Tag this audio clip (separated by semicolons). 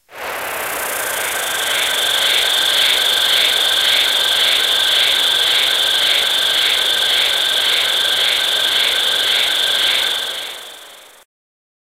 Bugs; Camping; Dark; Forest; Horror; Night; Scaary